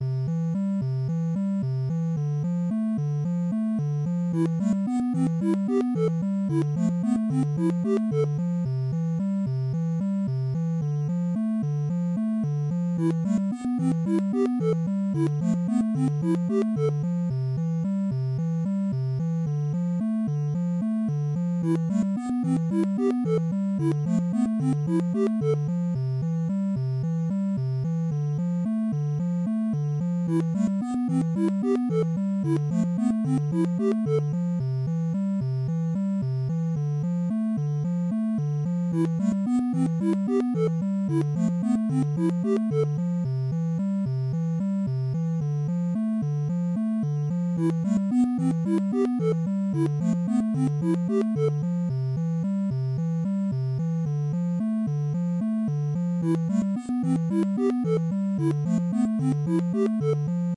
Lost Moon's -=- All Mighty Constant's
a bubble-E jam recorded using some older sounding synths